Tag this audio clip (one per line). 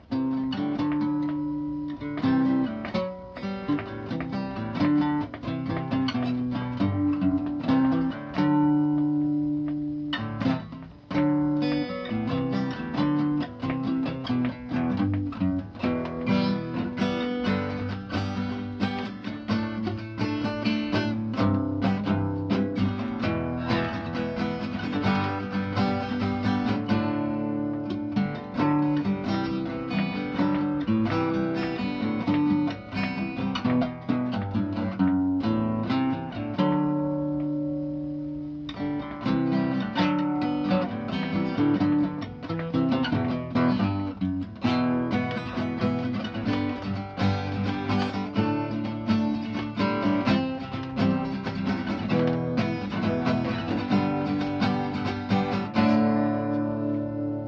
Rock
Folk
Acoustic
Creationary
Guitar